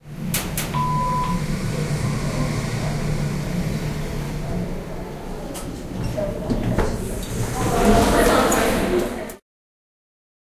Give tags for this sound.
elevator; move; machine

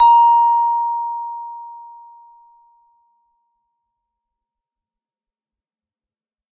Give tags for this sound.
vibraphone; pack; instrument

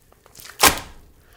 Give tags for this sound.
slap; fall; guts

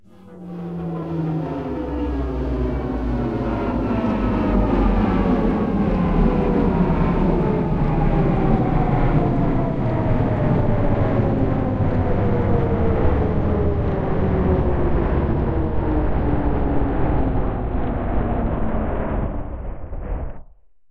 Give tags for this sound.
abstract; space

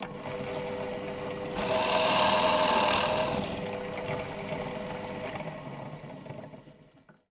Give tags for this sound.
mechanical; electric; machinery; motor; workshop; industrial; drillpress; drill; machine

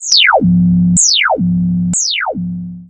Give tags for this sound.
alert; siren; 8bit; alarm; warning; emergency

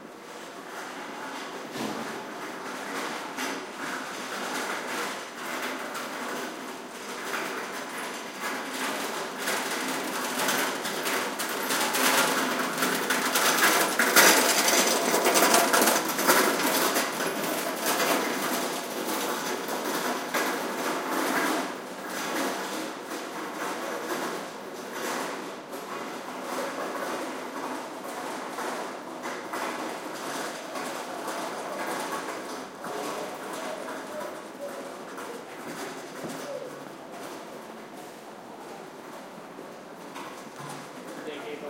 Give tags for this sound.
field-recording; street; pavement; ambiance; city

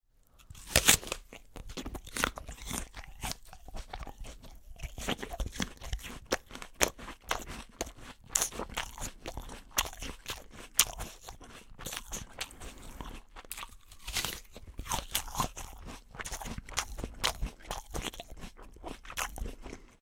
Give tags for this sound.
Mouth; Biting; Fruit; Munch; Eat; Chewing; Food; Chew; Rode; Bite; Lunch; Apple; Crunch; NT-2A; Foley; Condenser; Eating; Teeth

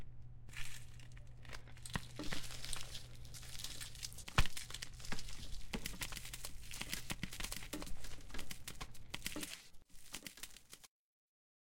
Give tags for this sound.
crackers; crunch; crushed; eggs; gram; leaf; walk; wrappers

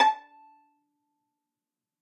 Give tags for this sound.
multisample; violin; strings; midi-velocity-95; vsco-2; midi-note-81; single-note; a5; solo-violin; pizzicato

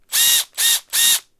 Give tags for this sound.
construction; cordless; drill; powerdrill; building; power-drill; tools; tool; power; electric-tool; drilling

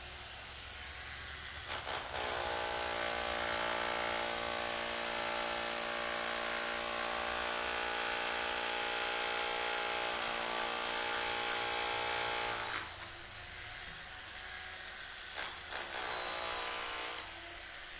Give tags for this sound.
building-worker; hand-drill; field-recording; construction

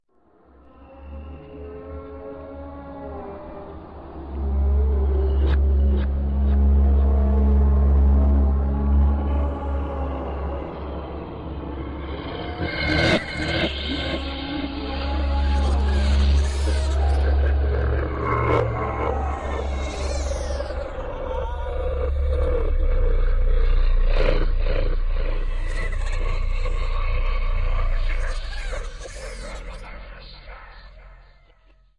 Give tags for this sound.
evil; Halloween; haunting; possessed; scare; scary

